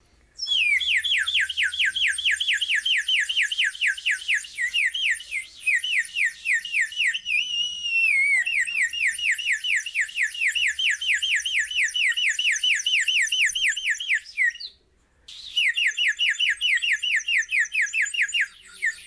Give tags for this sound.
Animal; Bird; Singing

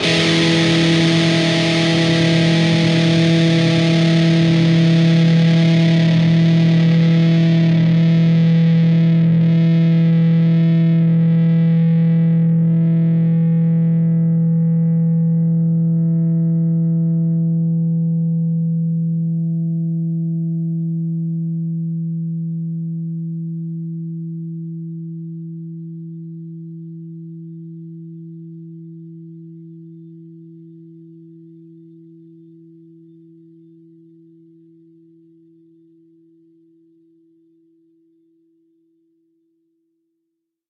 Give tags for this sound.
rhythm; chords; distorted-guitar; rhythm-guitar; distorted; distortion; guitar-chords; guitar

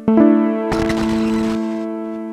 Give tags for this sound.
Bent; Casio; Hooter

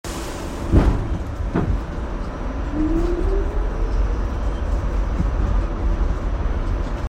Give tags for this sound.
Subway; MTA; train

